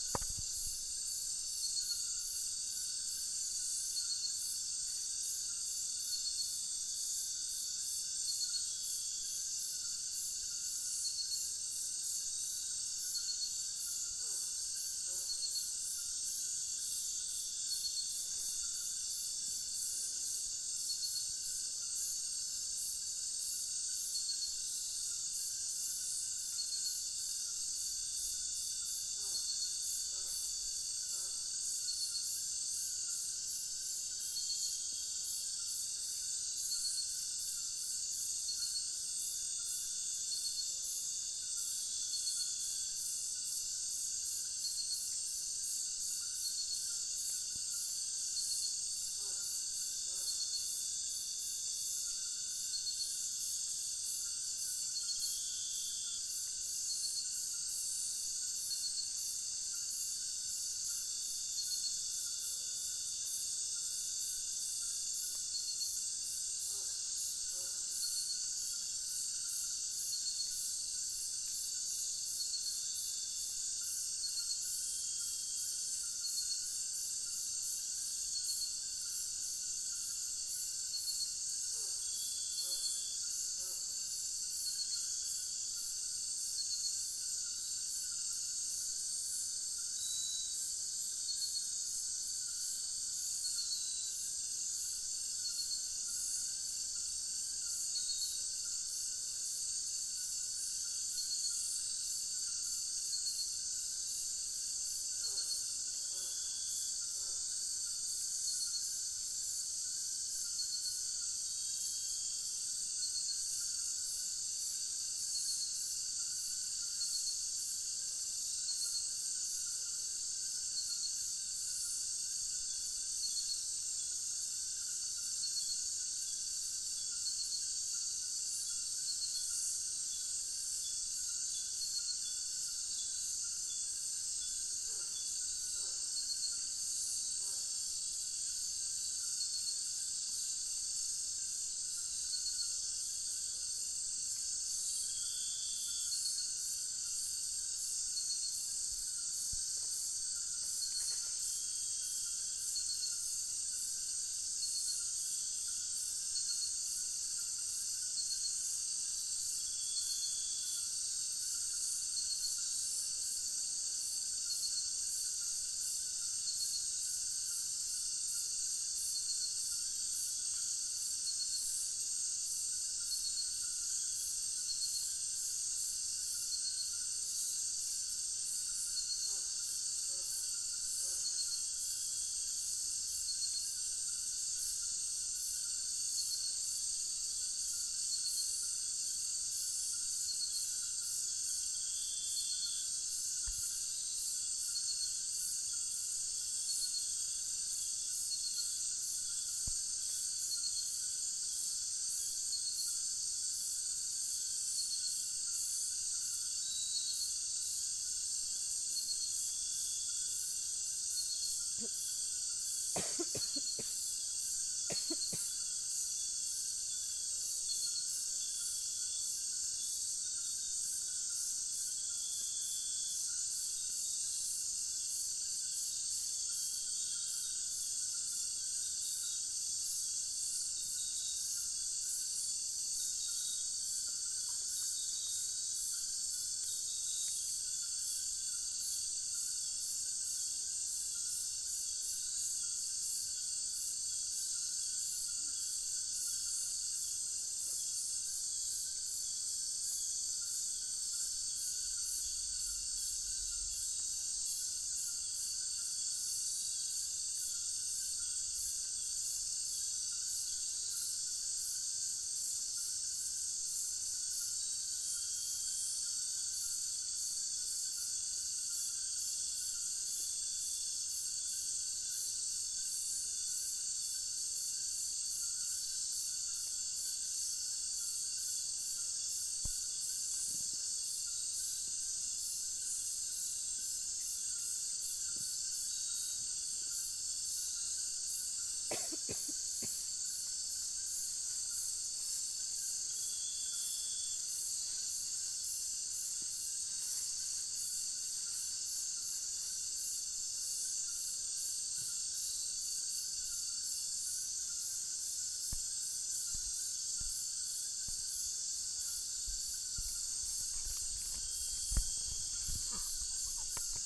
A field-recording of the night sound in the amazonian jungle
insects, Jungle, Athmo, nature, ambient, night